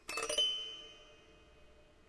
Fretless Zither "pin-drop" Gliss
A gliss using the small ends of the wires on a fretless zither where they meet the tuning pegs. Rather strange sound.
glissando, zither, pluck, fretless-zither